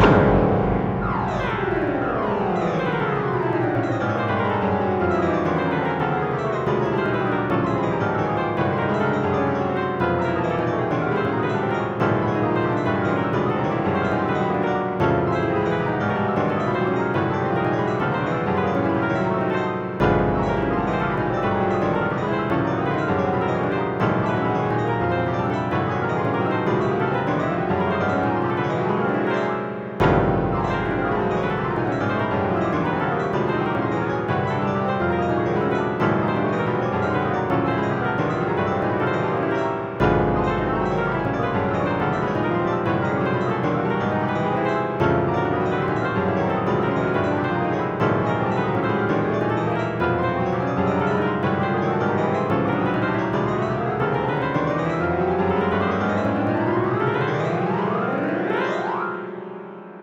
Whitney Music Box, variation 11, "minute waltz"
60 notes are used in the music box, whose cycle lasts 60 seconds.
Music generated using a program written in the Nyquist language.